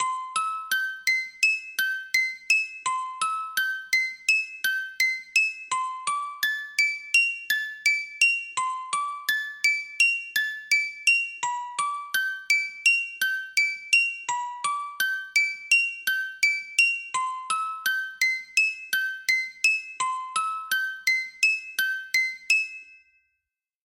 Music Box Playing Berceuse - Brahms
instrumental, sound-museum, melancholy, metallic, wind-up, classical, melancholic, music-box, box, mechanical-instrument, jingle, hand-operated, antique, historical, mechanical, mechanism, music, musical, musicbox, musical-box, old